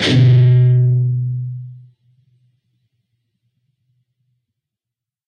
Dist Chr B Mid-G up pm
G Major chord but starting from the B. A (5th) string 2nd fret, D (4th) string open, G (3rd) string, open. Up strum. Palm mute.
chords, distorted, distorted-guitar, distortion, guitar, guitar-chords, rhythm, rhythm-guitar